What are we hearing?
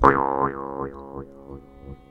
band-filtered, keeping frequencies between 200Hz and 2500 Hz. timbre feels muted, has less of an "edge" than that of the original.